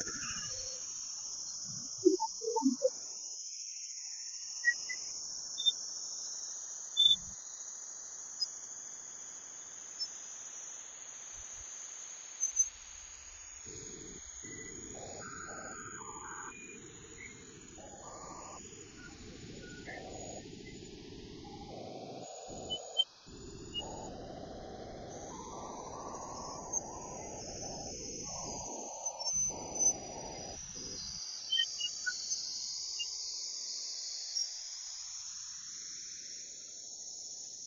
RGB values are plotted as sound, usually very raw but sometimes it sings back!